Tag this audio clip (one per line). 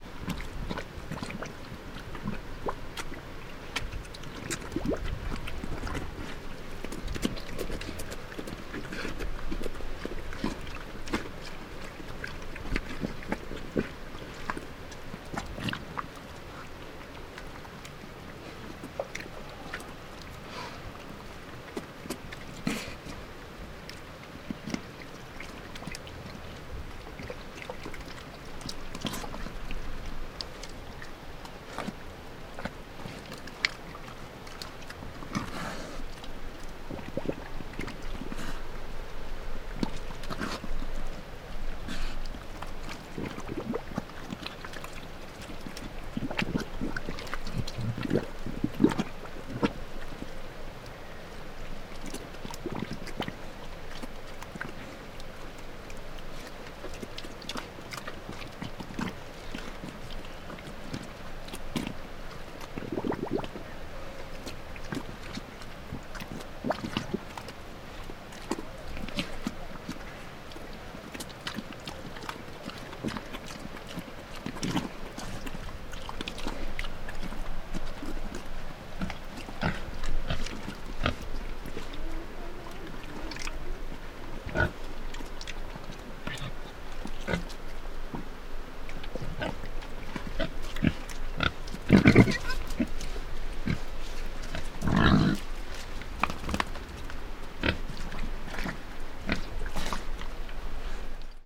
mud
iruya
squeal
argentina
bubbles
grunt
pee
salta
field-recording
pig